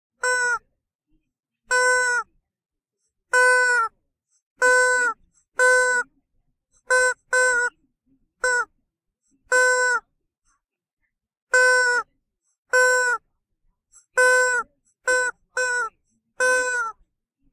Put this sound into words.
Store Pet Section Squeaky toy
ambience, can, checkout, clink, clunk, crinkle, food, produce, store